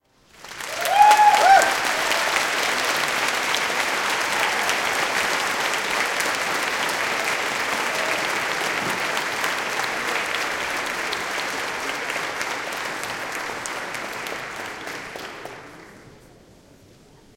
applause int large crowd church4
large, crowd, int, church, applause